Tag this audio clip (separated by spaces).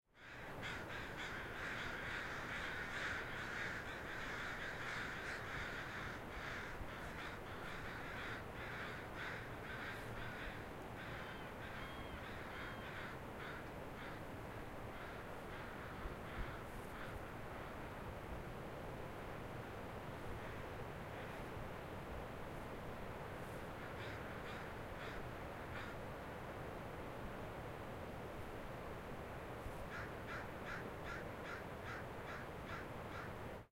birds crows morning river